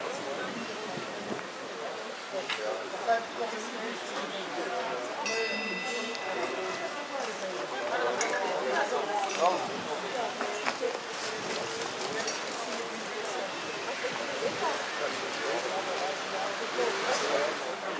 Sound of a flea market;
you can loop it
restaurant, german, crowd, people, many, talking, cafe